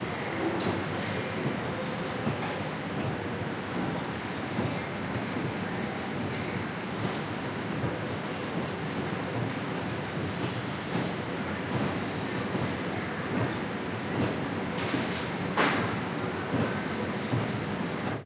Shopping Mall, escalator
Escalator in a shopping center, people and store ambience on the background.
escalator, mall, shopping, store